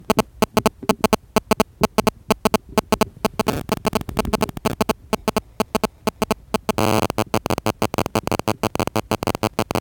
Just two phones trying to communicate - here's what a zoom h2n picks up when near them.